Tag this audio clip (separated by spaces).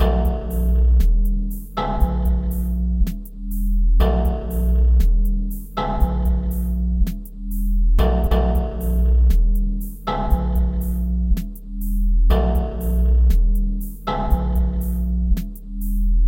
bass detuned drums piano techno